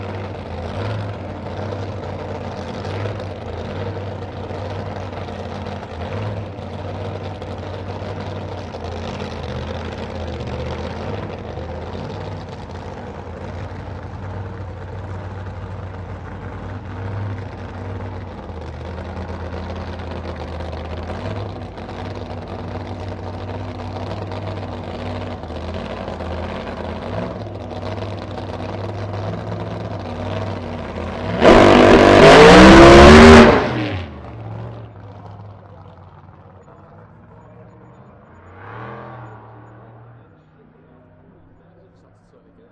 Pro Stock 2 - Santa Pod (C)
Recorded using a Sony PCM-D50 at Santa Pod raceway in the UK.
Motor-Racing, Race, Dragster, Engine